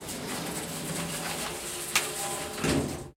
closing elevator doors 1
The sound of closing elevator doors in a hotel.
close, door, closing, lift